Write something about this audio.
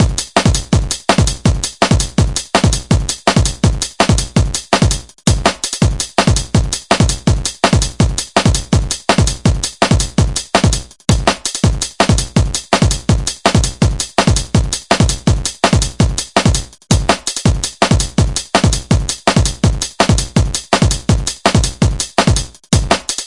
I took a few one-shot samples from a bunch of free packs I downloaded off the net (legally), I gave the kick some subtle crunchy distortion in the high end whilst trying to retain it's core sound and it's punch, I altered the transients and the pitch of the samples to make them pop more and sound more to the point and I placed a coloring compressor on the master to give it a bit more overal volume raise without causing it to clip in a bad way.